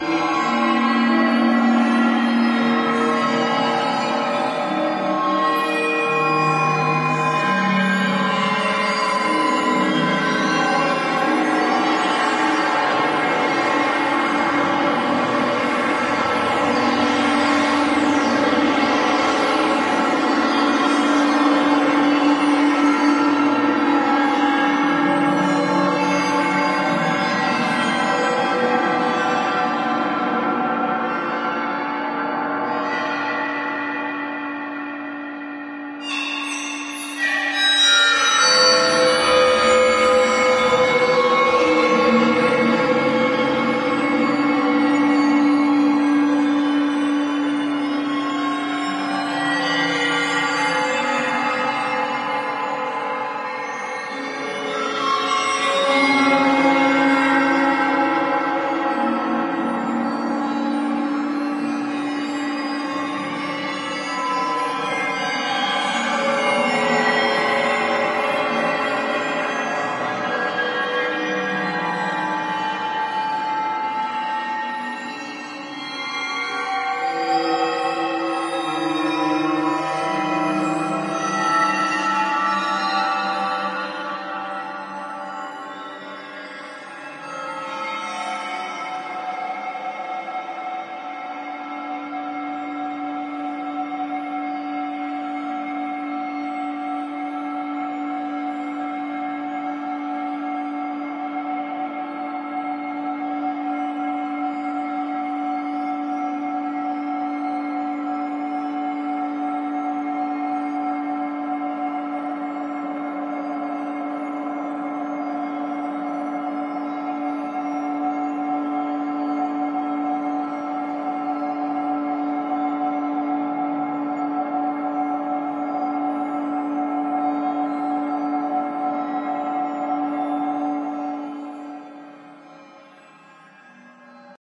This is Reaktor's Travelizer sequenced with MIDI LFOs off the Nord G2. Then processed with Nord filters and Delays. Various Logic plug ins and the UAD's emulations of the Fairchild/Plate140/Neve1073/Roland SpaceEcho. Which were also receiving animation signals from the MIDI LFOs.
travelizer, g2, granular